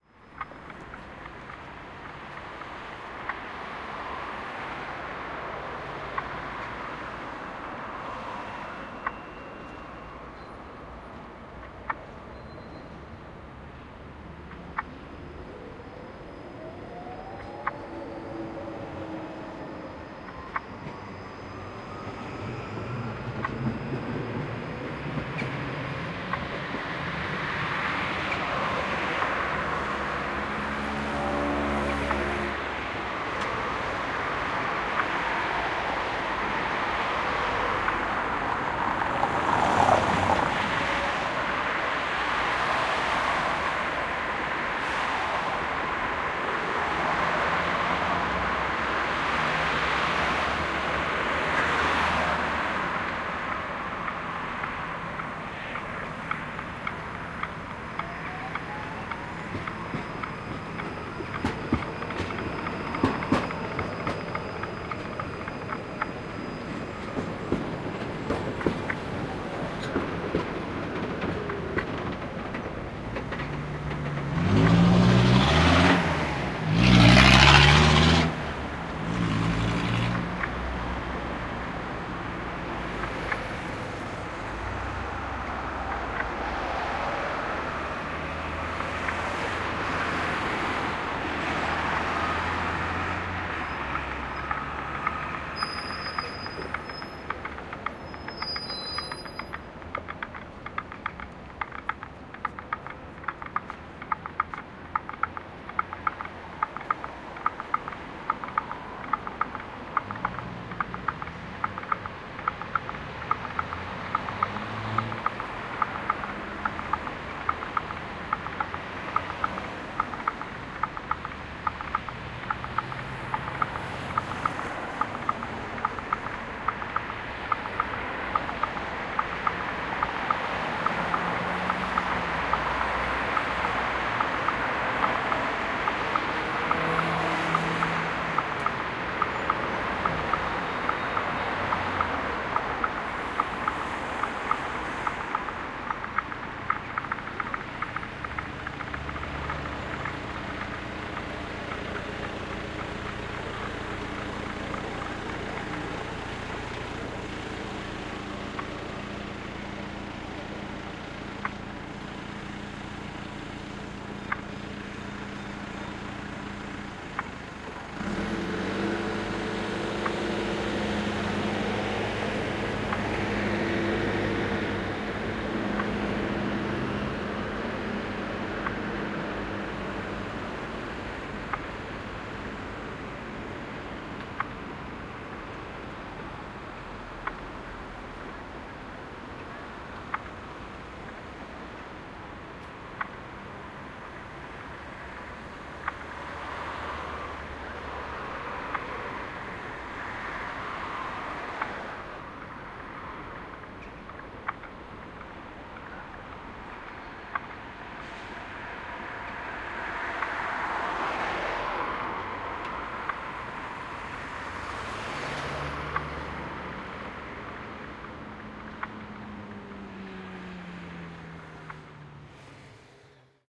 hi-fi szczepin 31082013 zebra crossing on legnicka street
31.08.2013: Sound of traffic on Legnicka street in Wroclaw (Poland) + ticking of traffic lights.
marantz pdm661mkII + shure vp88
Wroclaw, street, tramway, zebra-crossing, road, noise, traffic, field-recording, car